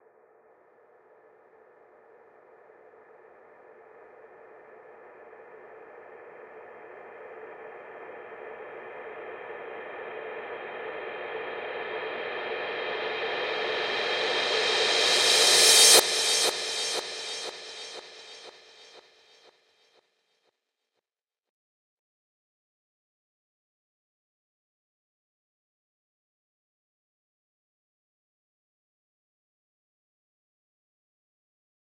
Reverse Cymbal Crash Sweep
A fairly simple effect made by taking a cymbal crash, adding loads of reverb, bounce/export back into DAW and then reverse the sample. Thus creating a reverse reverb effect. I also added a little delay on the end of this sample for extra effect.
Build, Crash, Cymbal, Reverse, Riser, Sweep